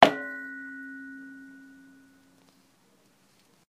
"Murder by Shovel" is the resounding dong when a shovel hits a person on the head (or any semi-hard object).
Hit, Shovel